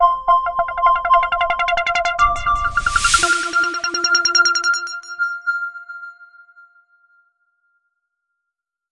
Great introduction sample music for a video.